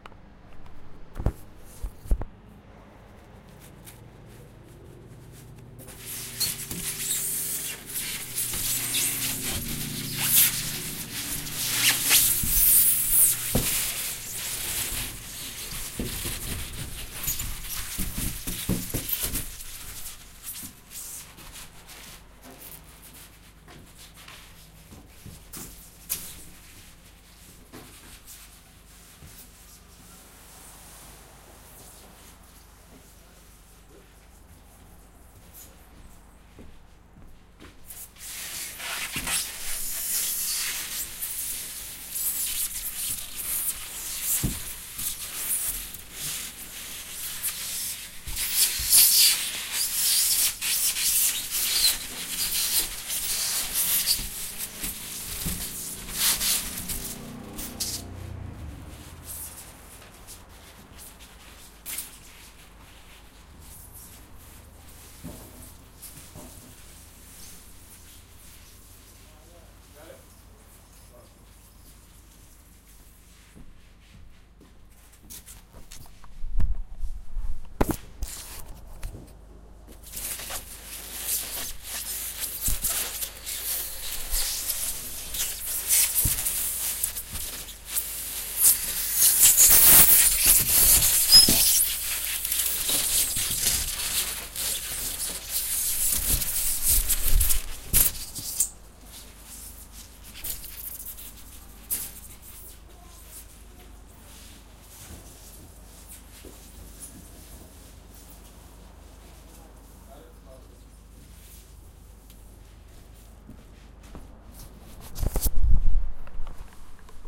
Industrial Blue foam (Noise)
Stereo
I captured it during my time at a lumber yard.
Zoom H4N built in microphone.